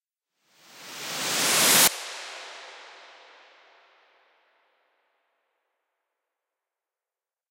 1 Bar Sweep Up / White Noise FX (128 BPM Key G)
This sound was created using Serum and using third party effects and processors on a bus channel. The sound would ideally suite the last bar of a build of a dance track to add tension before the transition to the next section.
1-bar, sweep-up, effect, key-G, sound-effect, rise, EDM, lift, white-noise, 128-tempo, 128-BPM